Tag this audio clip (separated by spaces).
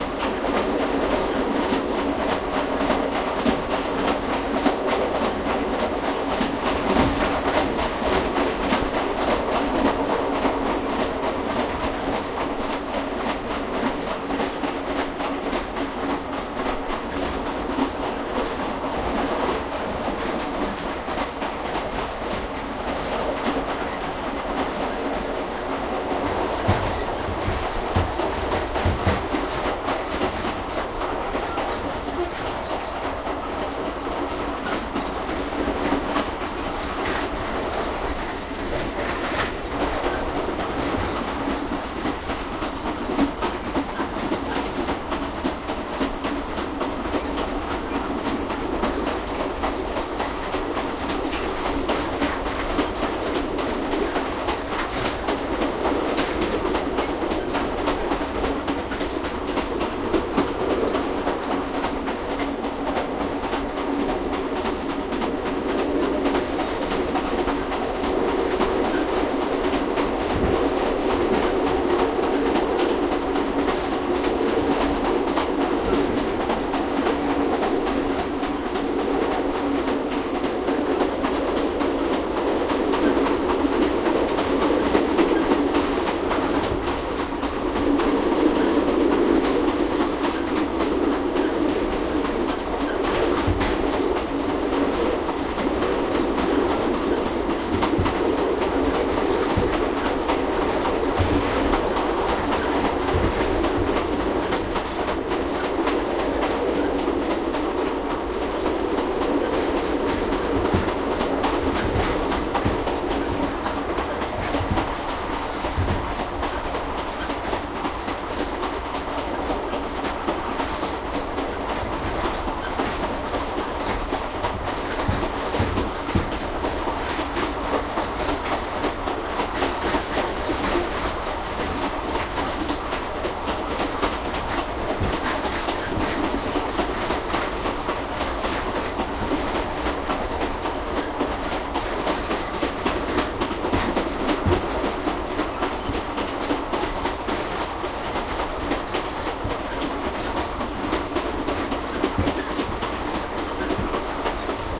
Ride Thailand Train Wheels